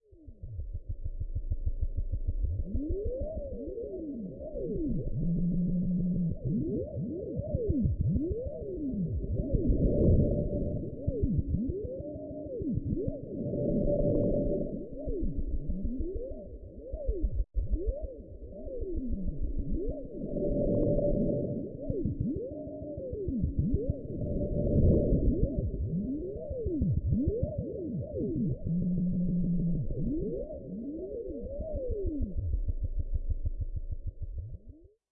incoming transmission1
ambience, ambient, atmosphere, claustrophobic, cold, communication, cosmic, drone, field-recording, hyperdrive, hyperspace, industrial, interior, interstellar, radio, sci-fi, soundscape, space, spaceship, transmission, vessel